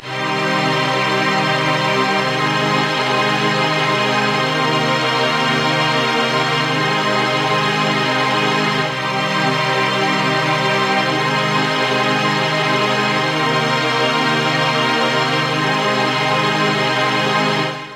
strings chords 109 bpm
4 chords I made in Cubase using the Turtle Beach Pinnacle sound card many years ago.
109, 109bpm, beach, bpm, loop, looped, pinnacle, string, strings, synth, synthetic, turtle